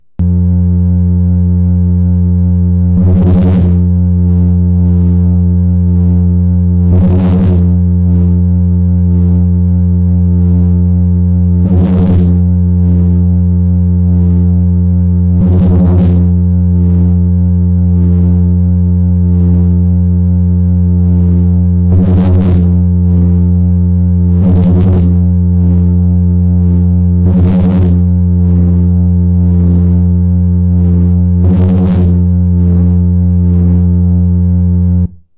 Lightsabre mk2
Low, modulated hum with swishing sound. Made on a waldorf Q rack.
drone, hum, lightsaber, scifi, starwars, synthesizer, waldorf